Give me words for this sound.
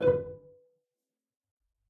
One-shot from Versilian Studios Chamber Orchestra 2: Community Edition sampling project.
Instrument family: Strings
Instrument: Cello Section
Articulation: tight pizzicato
Note: B4
Midi note: 71
Midi velocity (center): 95
Microphone: 2x Rode NT1-A spaced pair, 1 Royer R-101.
Performer: Cristobal Cruz-Garcia, Addy Harris, Parker Ousley
b4, cello, cello-section, midi-note-71, midi-velocity-95, multisample, single-note, strings, tight-pizzicato, vsco-2